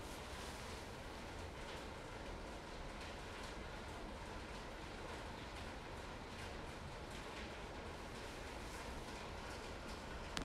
Rain on a metal roof, from a distance
Rain on a corrugated metal roof, from a distance in a reverberative room.
Similar: Gentle rain on metal roof.
ceiling, corrugated-metal, metal, rain, roof